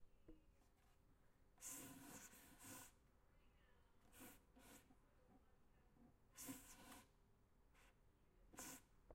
Object on a wall being moved it can be anything